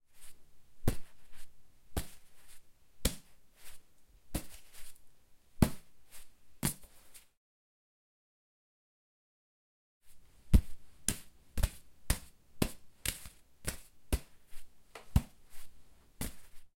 catching and throwing the ball